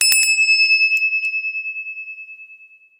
A ghantee is a devotional instrument. Its chime is produced by shaking the stem attached to the bell.